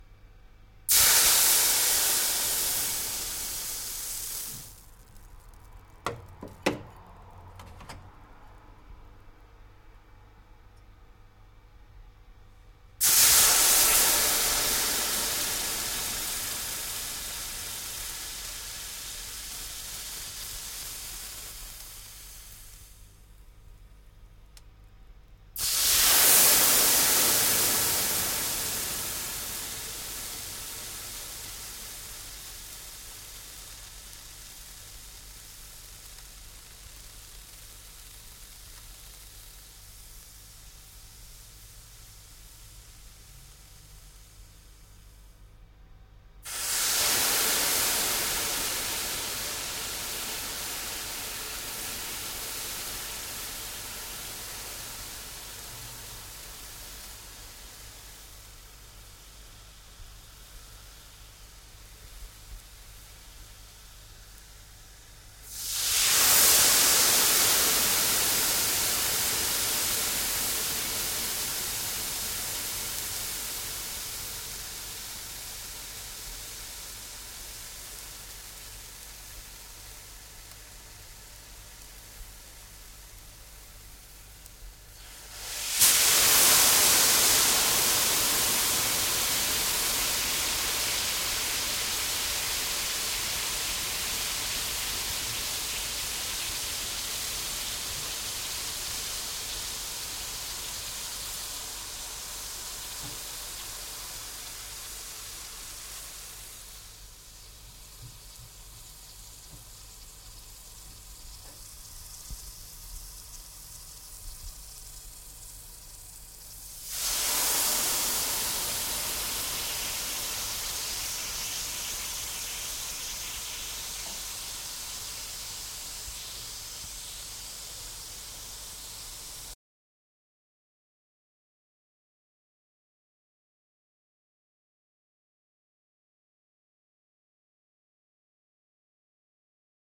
Water evaporating once dropped onto a hot pan - take 9.
evaporate, hiss, ice, kitchen, steam, vapour, water